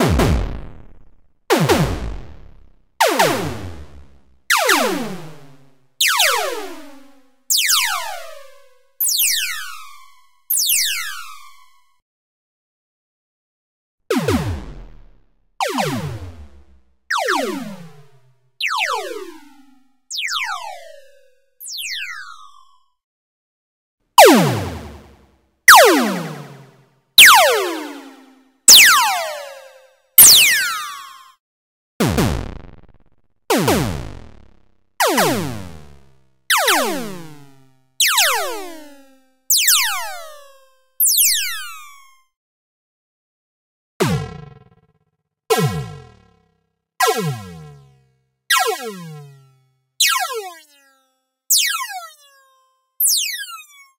Laser compilation 04
Created using the Korg Electribe 2 (the synth variant) analogue modeling synthesis engine and FX.
If you don't like the busywork of cutting, sorting, naming etc., you can check out this paid "game-ready" asset on the Unity Asset Store:
It's always nice to hear back from you.
What projects did you use these sounds for?
gun, short, game, space, shot, phaser, weapon, arcade, zap, shoot, blaster, simple, ship, synthetic, video-game, laser, classic, Sci-Fi, spaceship, electronic, shooting, action, blast, retro, fire, lo-fi, science-fiction